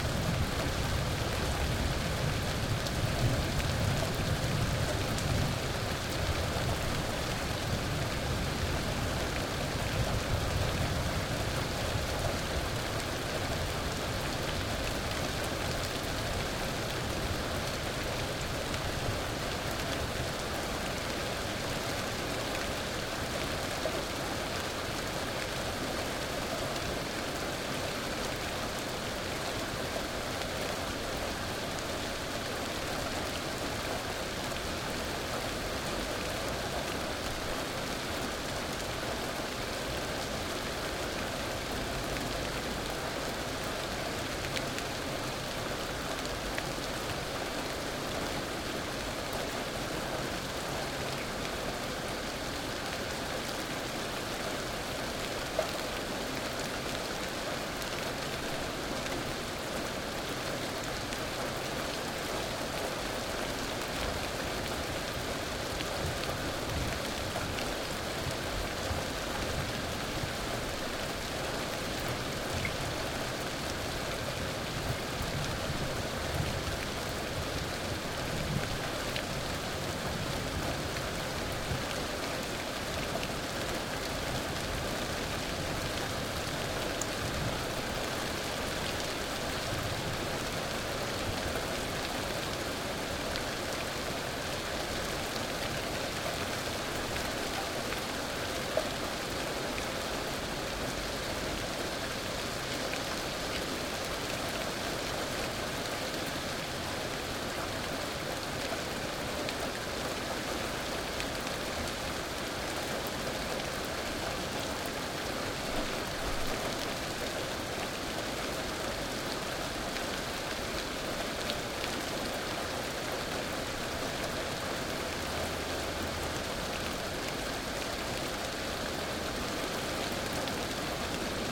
Rain without thunder
Some rain sound with nice details in the high frequencies
Mic: Rode NTG2
IF: Focusrite Scarlett 2i4
nature, rain, rainfall, shower, sprinkle, weather